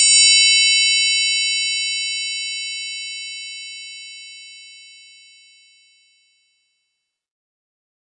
Clean and long synthetic mini-cymbal in C (low octave) made with Subtractor of Propellerhead Reason.